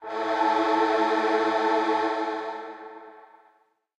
120 Concerta male choir 02
layer of male choir
concert, piano, loop